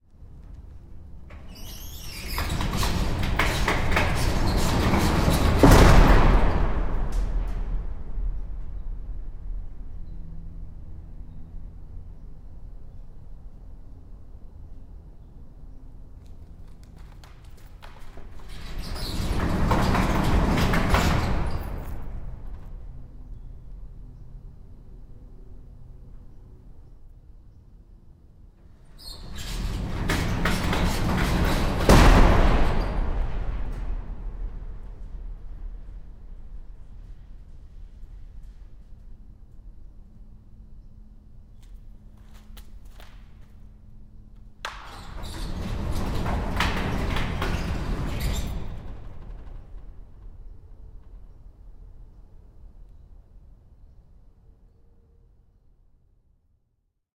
Slamming shut and opening a huge metal warehouse door on rollers. Metal on metal sounds, with huge boom at the end.
Sound Devices 722
Behringer ECM 800
door, field-recording, metal, open, phoenix, shut, slam, urban, warehouse